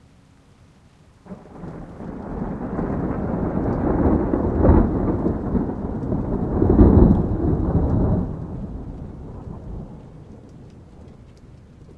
ThunderSound (1), recorded with my Blue Yeti Microphone.
Sound, Horror, ThunderSound, Thunder, Loopable, Thriller